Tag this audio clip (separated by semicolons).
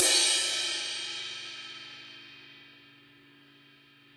1-shot; cymbal; multisample; velocity